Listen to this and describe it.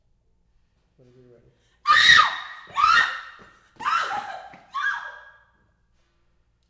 girl scream frank 4
girl, horror, scary, scream, screaming, screams, woman